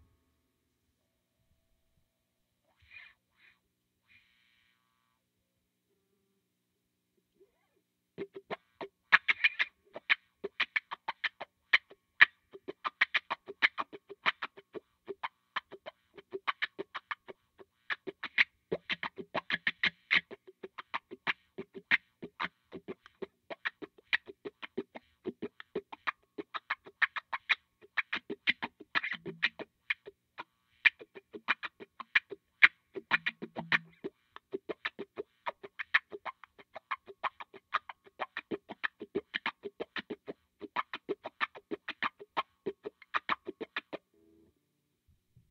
Some wah wah guitar I recorded for a video, sharing the guitar take from the track. Tascam DR-40 through a digital performer DRV-100 (best mic I had at the time) recording a Stratocaster playing through a Crybaby wah pedal into a Fender Twin Reverb Amp.
crybaby, electric-guitar, fender, guitar, porn-music, stratocaster, twin-reverb, wah-wah